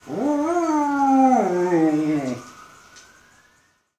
the dog siren is kaput